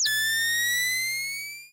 A recreation of the night vision sound effect from Splinter Cell.
Made in Audacity.
Hunting trolls since 2016!
BTC: 36C8sWgTMU9x1HA4kFxYouK4uST7C2seBB
BAT: 0x45FC0Bb9Ca1a2DA39b127745924B961E831de2b1
LBC: bZ82217mTcDtXZm7SF7QsnSVWG9L87vo23